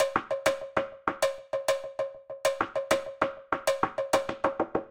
DM 98 707roomy prc
Vintage drum machine patterns
Beat, Drum, DrumLoop, Drums, Electric, Electro, Electronic, House, IDM, Loop, Machine, Retro, Trap, Vintage